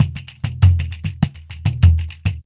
Samba Pandeiro (v2)
A simple standard samba beat played on the pandeiro, postprocessed with Electri-Q 'digital'
beat, brasil, pandeiro, samba